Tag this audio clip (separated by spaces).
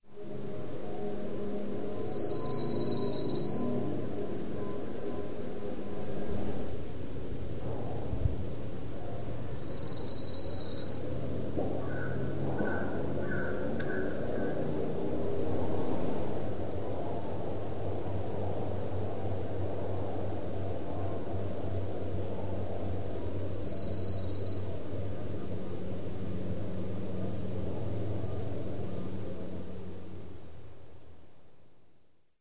bird birdsong dendroica field-recording forest nature nature-ambience naturesound peaceful pine-warbler pinus serene spring woods